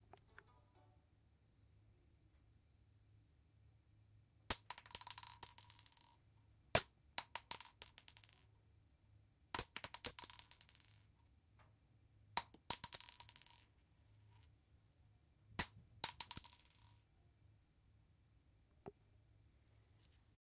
Several empty 7.62 rifle cartridges clanging on a hard surface.
ammunition, cartridge, bullet, ammo, gun